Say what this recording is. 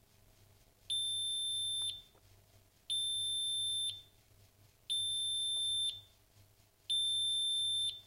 4 faint beeps emitted by a grill unit timer
beep, timer, machine